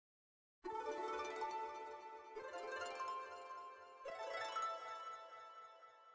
Chimes created using midi in Pro Tools.
chimes, fairy, magic, midi, sparkle, spell